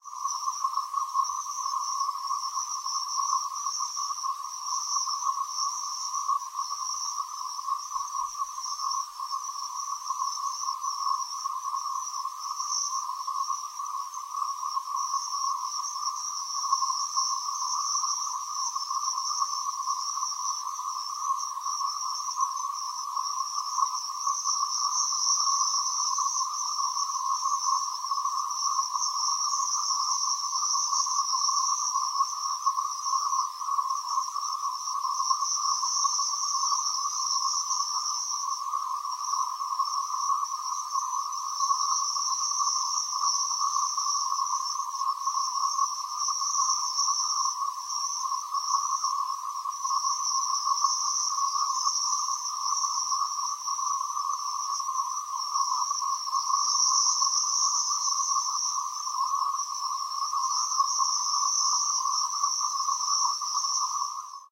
cicadas long
There was a giant swarm of cicadas, and I recorded them. You can hear the individual ones that are close in the background of millions of ones in the distance.
bugs, cicadas, insects, tone